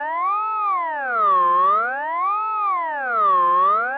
typical up 'n down